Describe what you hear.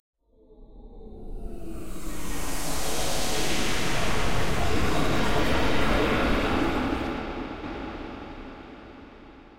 Space Attack2
Heavily processed VST synth sounds using various filters, delays, chorus, flangers and reverb.
Phaser, Spaceship, Outer, Space, Hyperdrive, Warp